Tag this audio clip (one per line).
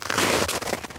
footstep
walk
footsteps
ice
snow
foley